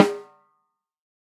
TAC14x8 M201 VELO8
The loudest strike is also a rimshot. Microphones used were: AKG D202, Audio Technica ATM250, Audix D6, Beyer Dynamic M201, Electrovoice ND868, Electrovoice RE20, Josephson E22, Lawson FET47, Shure SM57 and Shure SM7B. The final microphone was the Josephson C720, a remarkable microphone of which only twenty were made to mark the Josephson company's 20th anniversary. Preamps were Amek throughout and all sources were recorded to Pro Tools through Frontier Design Group and Digidesign converters. Final edits were performed in Cool Edit Pro.
14x8, artwood, beyer, custom, drum, dynamic, m201, multi, sample, snare, tama, velocity